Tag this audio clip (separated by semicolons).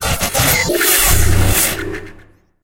glitch; opening; transition; drone; abstract; atmosphere; scary; transformer; metal; stinger; morph; horror; rise; metalic; game; impact; woosh; hit; transformation; futuristic; noise; destruction; background; dark; Sci-fi; moves; cinematic